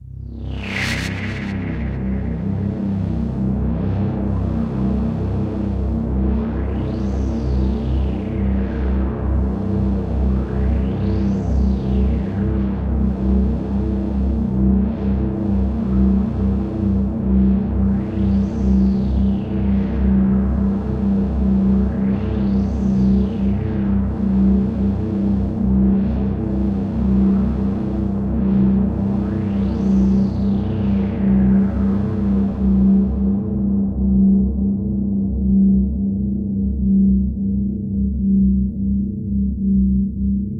Alien UFO Spaceship Cruising Sound Effect.
Hope this helps you out in your journey of video making.
Download full pack at: